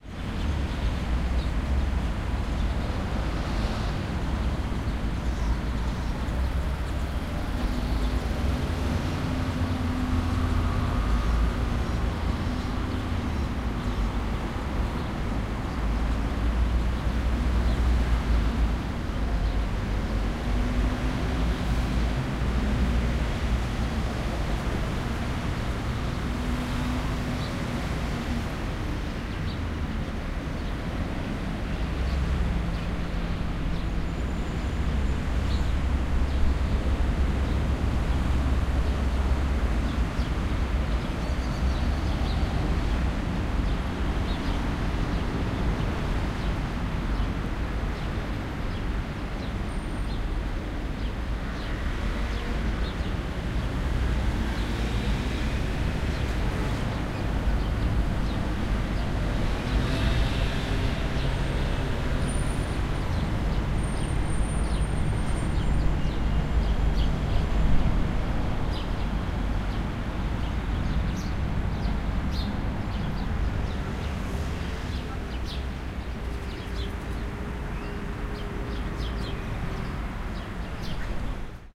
Birds, traffic.
20120326